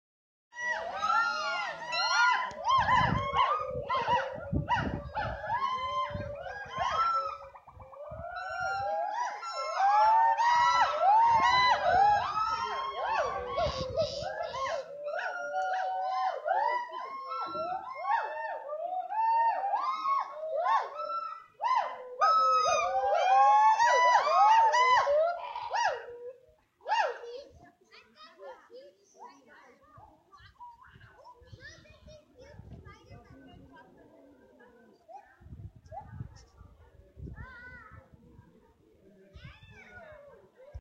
Recorded with an Alcatel OneTouch Flint at a Gibbon sanctuary in southern California. Gibbons are matriarchal, and each group has its own call. You can hear some other visitors of the sanctuary in addition to the gibbon calls.